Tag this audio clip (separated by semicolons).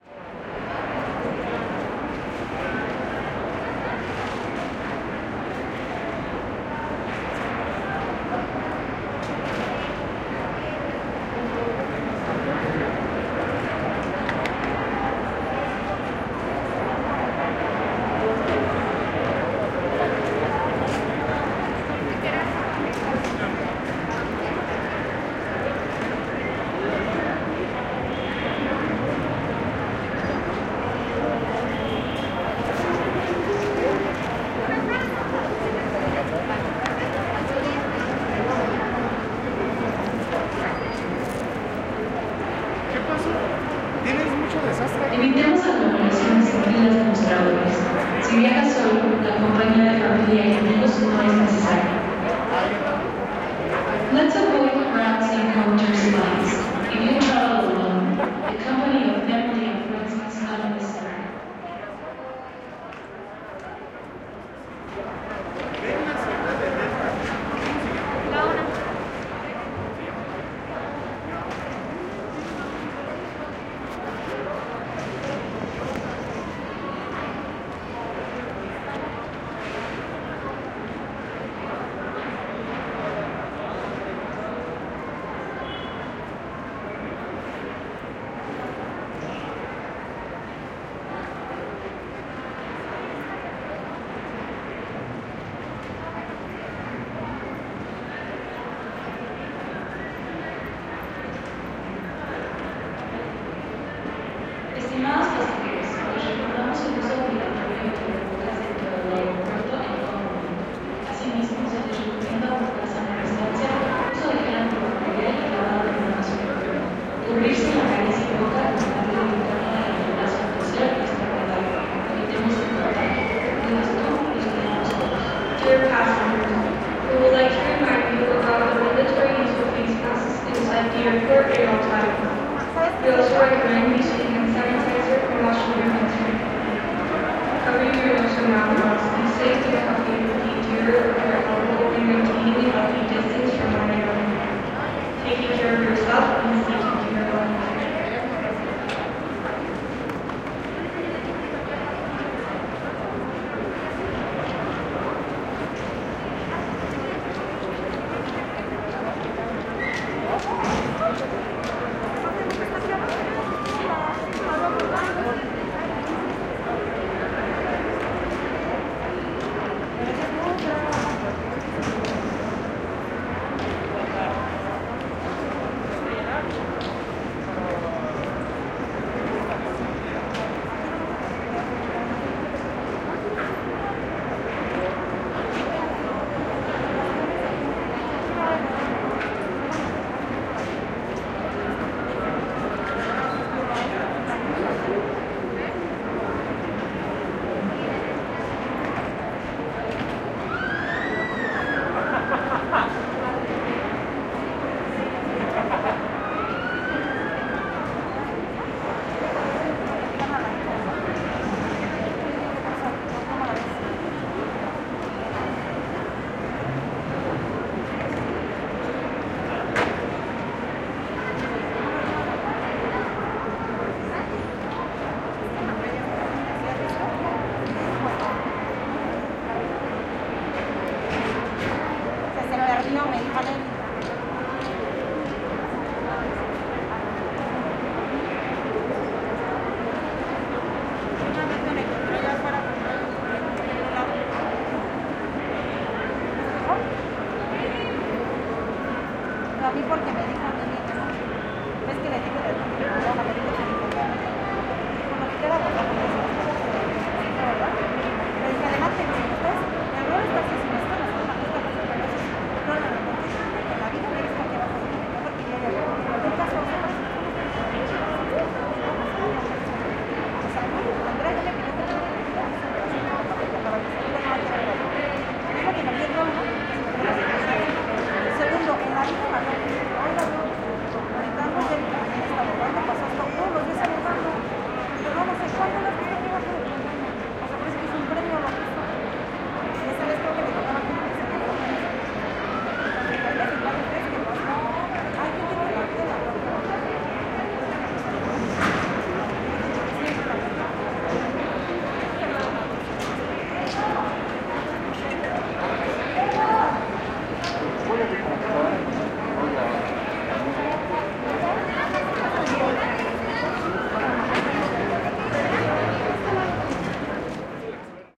Airport; Ambience; Announcement; Field-Recording; crowd